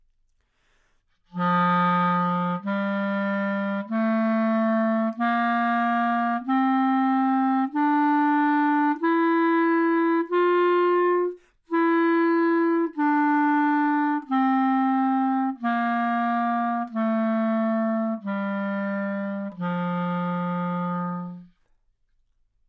Clarinet - F major
Part of the Good-sounds dataset of monophonic instrumental sounds.
instrument::clarinet
note::F
good-sounds-id::7577
mode::major
scale, neumann-U87, good-sounds, clarinet, Fmajor